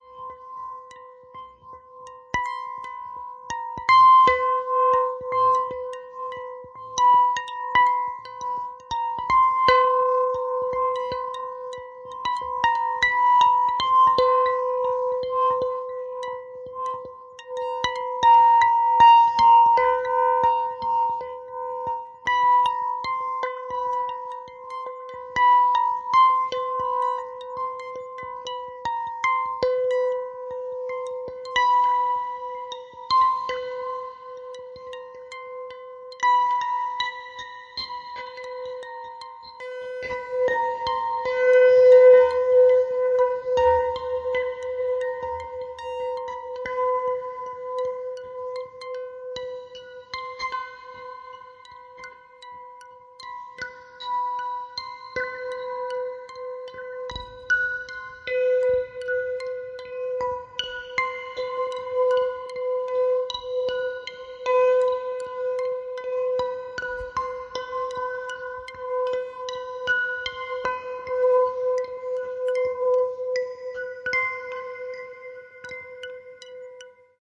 Morphagene Reel 3
Created using my Eurorack system and formatted for use with the Make Noise Morphagene.
Enjoy!